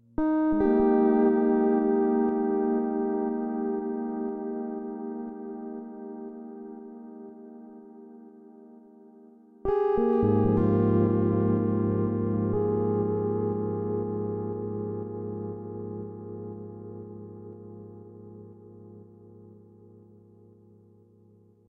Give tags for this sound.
electronic
guitar
music
processed

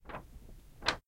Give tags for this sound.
Billowing
Material
Wind

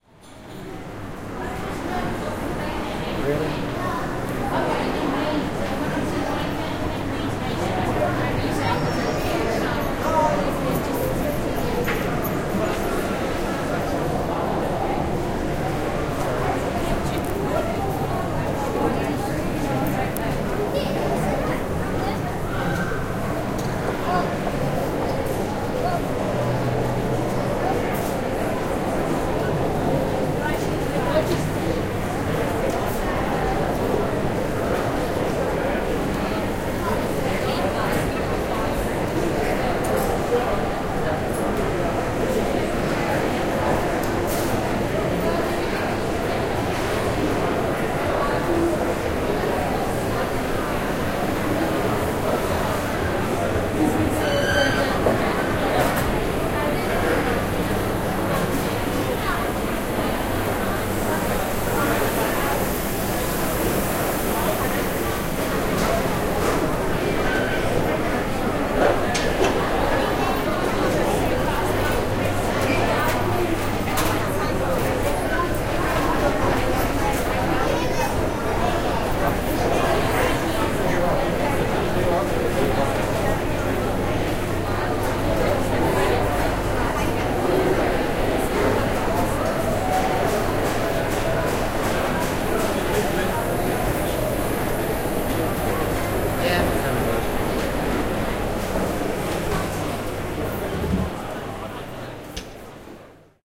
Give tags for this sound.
ambience
shopping-mall
food-hall

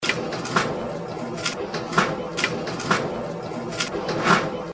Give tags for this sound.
coins
field-recording
grocery-store
processed

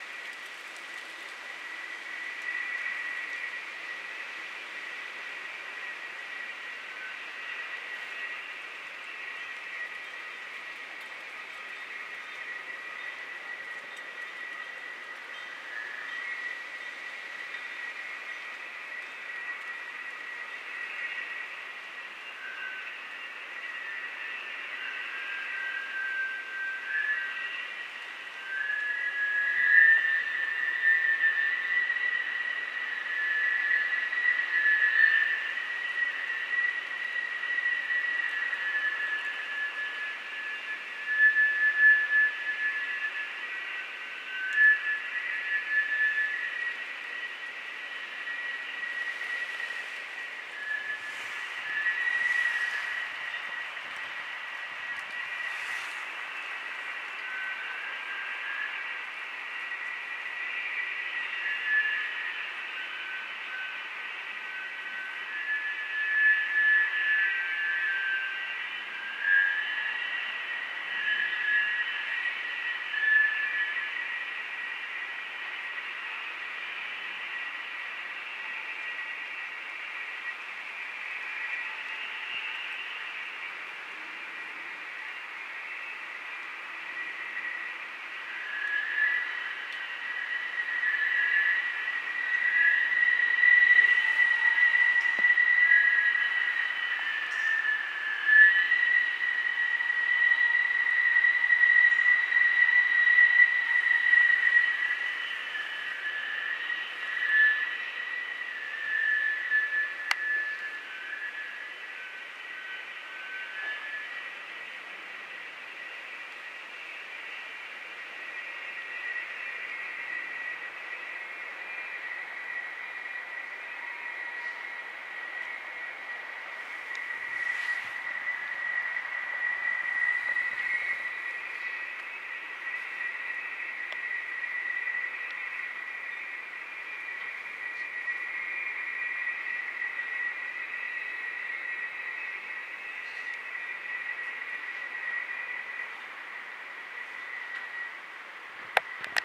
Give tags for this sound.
am
City
Frankfurt
Main
recording
Skyscraper
Wind

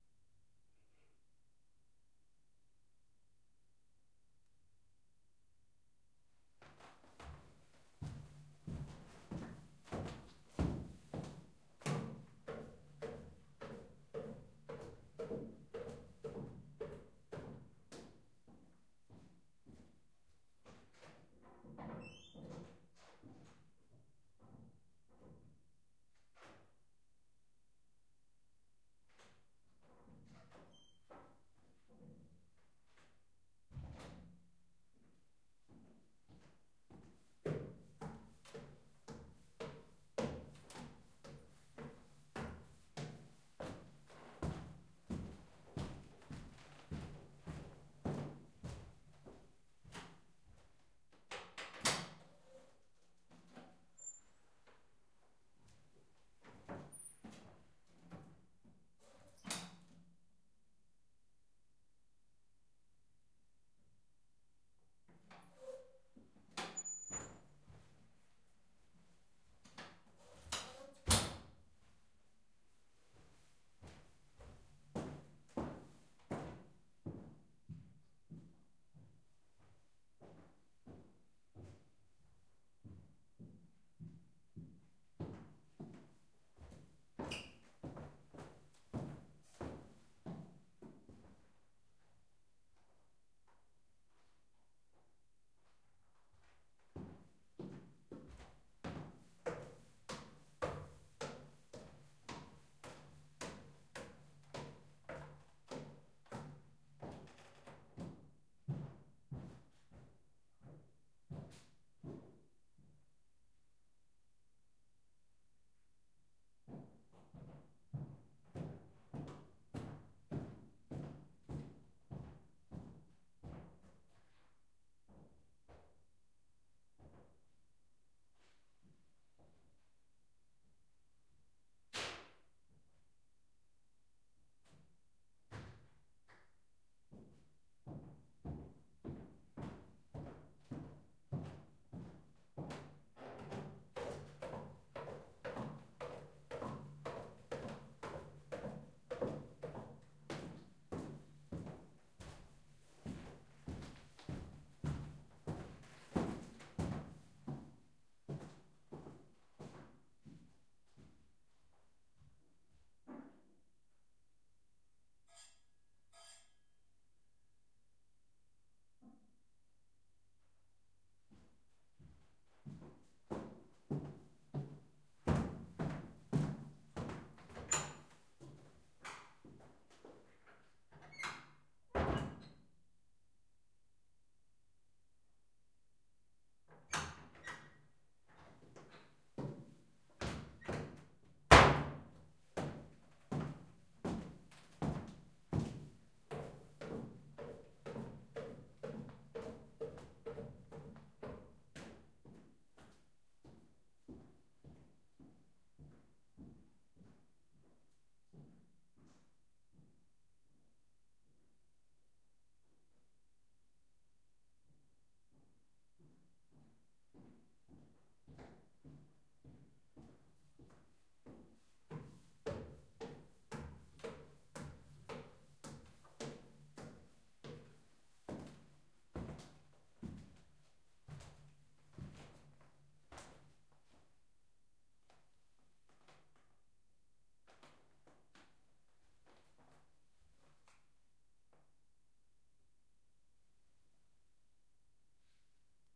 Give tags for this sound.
creaky
farm
field
floor
recording
steps
walk

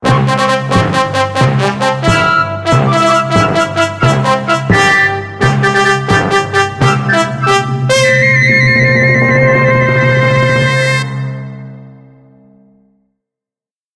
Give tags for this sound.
cartoon
fanfare
funny
silly
theatre

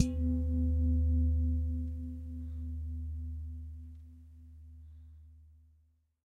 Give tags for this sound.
household
percussion

